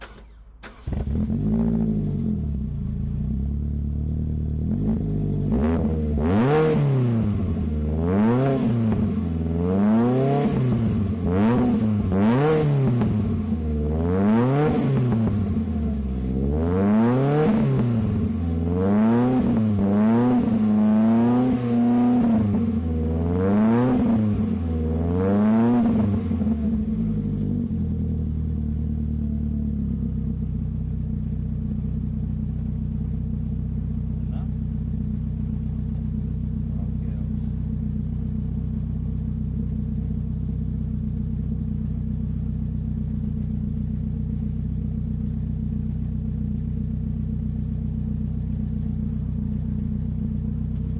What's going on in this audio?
Lotus Elise start rev idle
car, vehicle